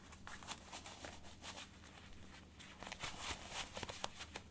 putting ongloves
Putting on a pair of leather gloves
hands, leather, rubber, clothing, stretch